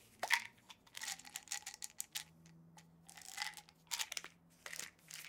Opening Pill Bottle
Bottle, Pill